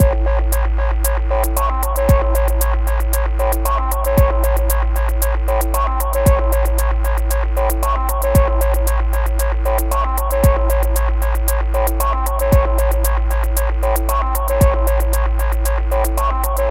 hard club trance loop 115bpm
Hard trance loop timed at 115bpm for your creative productions, enjoy :)
club, dance, floor, hard, loop, looper, sequence, sub, techno, trance, trippy